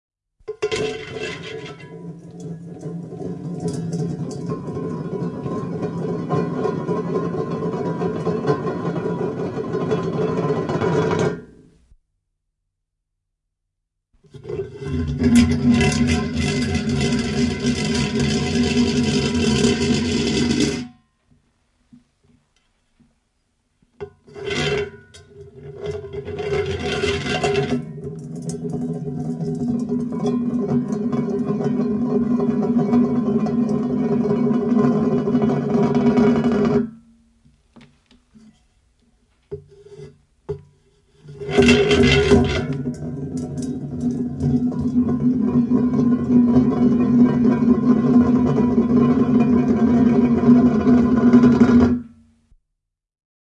Vati pyörii, metallinen pesuvati / Metal bowl, basin, spinning on the floor, various
Metallinen vati pyörii hyrränä reunoillaan lattialla. Erilaisia.
Äänitetty / Rec: Analoginen nauha / Analog tape
Paikka/Place: Suomi / Finland / Yle / Tehostearkisto / Soundfx-archive
Aika/Date: 1990-luku / 1990s
Basin, Bowl, Field-Recording, Finland, Finnish-Broadcasting-Company, Metal, Metalli, Pesuvati, Soundfx, Spin, Spinning, Suomi, Tehosteet, Vati, Whirl, Yle, Yleisradio